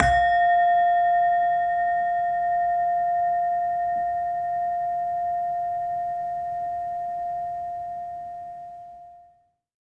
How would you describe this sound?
Medieval bell set built by Nemky & Metzler in Germany. In the middle ages the bells played with a hammer were called a cymbala.
Recorded with Zoom H2.